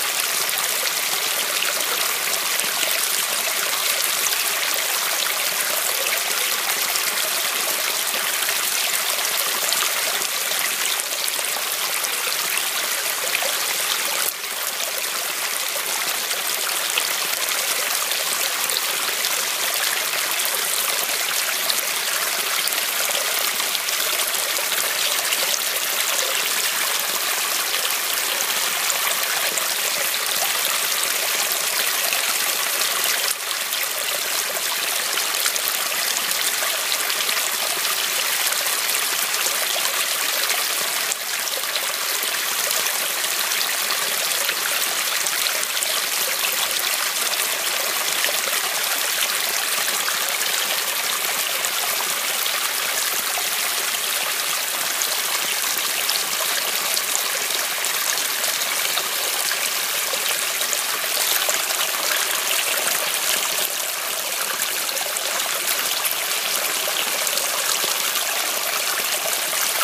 Waterfall Loop
Loopable recording of fast flowing water like waterfall. SOUNDS MUCH BETTER WHEN DOWNLOADED. Recorded with a 5th-gen iPod touch. Edited with Audacity. 0 licence
creek, field-recording, flow, geotagged, loop, purist, river, run, seamless, stream, water, waterfall